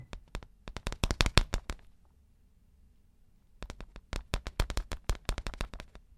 monkey running paws 1
Foley SFX produced by my me and the other members of my foley class for the jungle car chase segment of the fourth Indiana Jones film.
monkey, paws, running